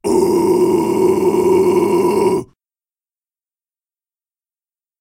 Alex-DeepGrowl1
Deep Growl recorded by Alex